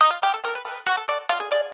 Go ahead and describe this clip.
This is a processed guitar at 140bpm.This one reminds me of anime